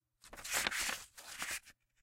Me rubbing a folded stiff printed paper on itself, as if it were being handled and slid across other papers or a folder.
crinkle, crinkling, crumple, crumpling, folder, page, pages, paper, papers, rustle, rustling, slide